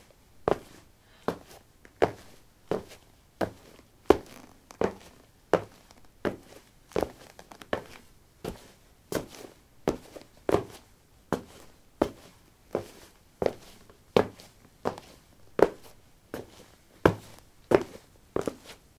lino 14a lightshoes walk
Walking on linoleum: light shoes. Recorded with a ZOOM H2 in a basement of a house, normalized with Audacity.
walking, steps, walk, step, footsteps, footstep